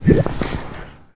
Extrange MicroSound Bubble
micro, extrange, sound